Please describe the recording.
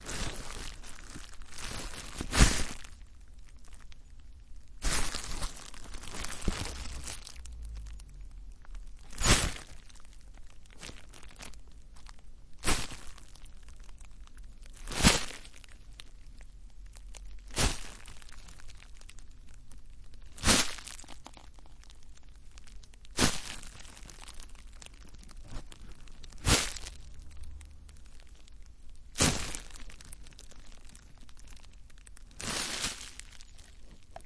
Bubble Wrap Crunch
Percussive scrunching bubble wrap. Stereo Tascam DR-05
asmr, bubble-wrap, bubblewrap, crinkle, crumple, stereo